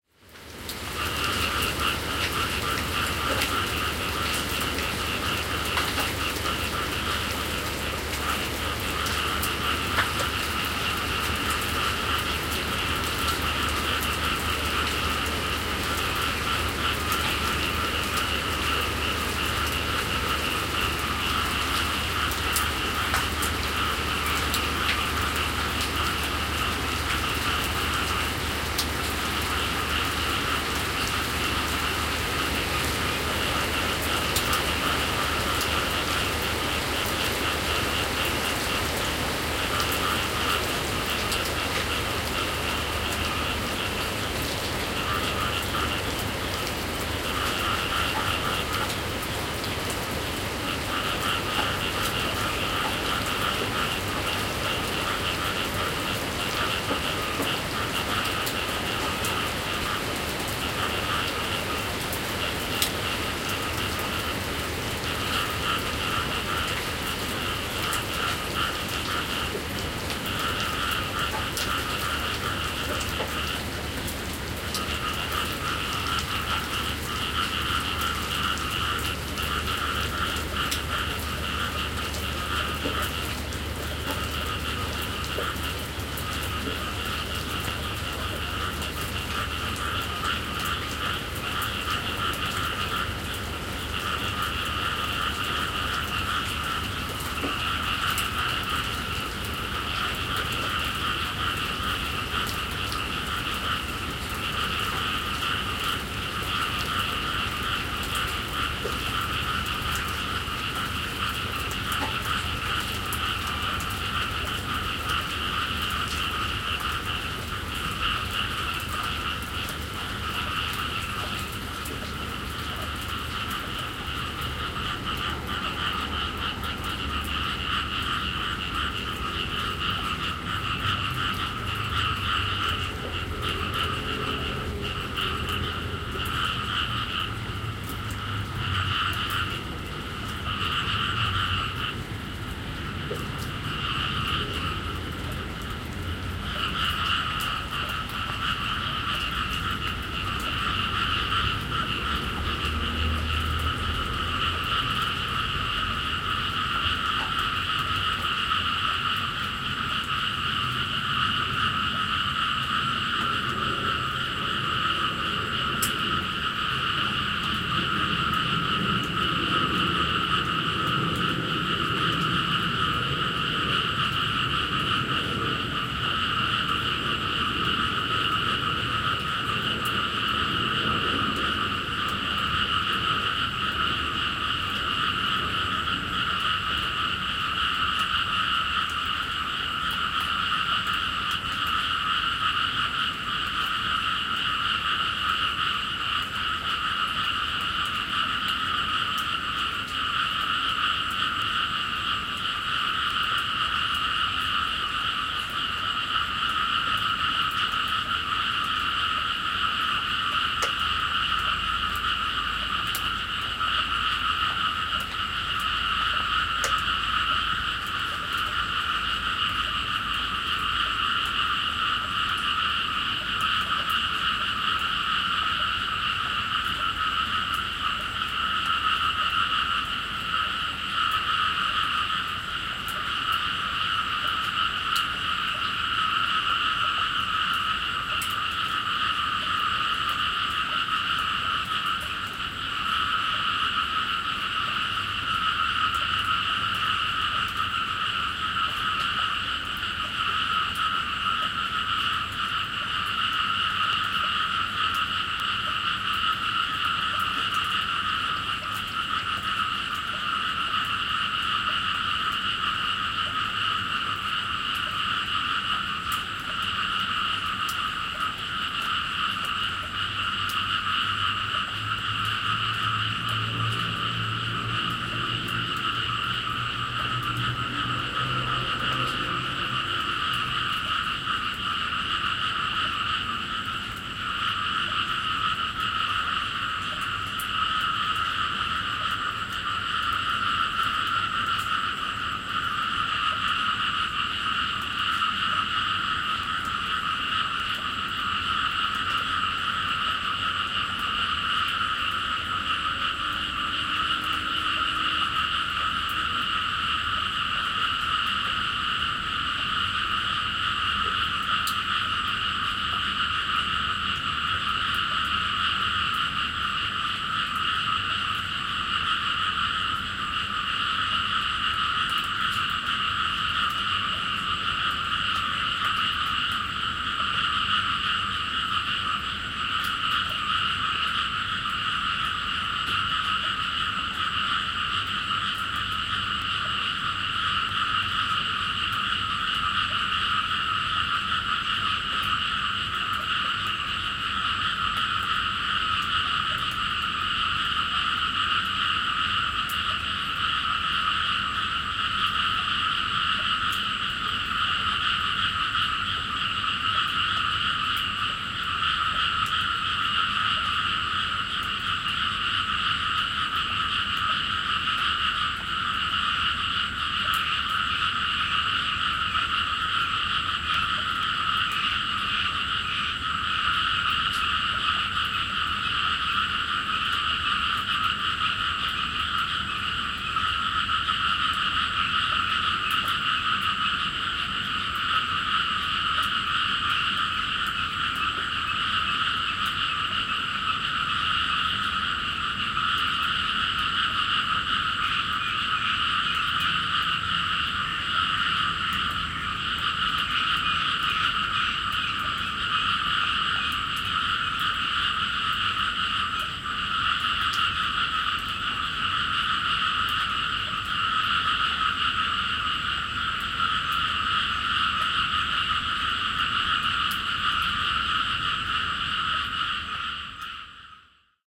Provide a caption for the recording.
Night ambience of frogs (I assume it's frogs) in the rice fields at the city limits of Hoi An / Vietnam. Decreasing rain. Towards the end, rain stopped completely, only drippings. Occasionally distant cars.
Binaural recording.
Date / Time: 2017, Jan. 11 / 00h46m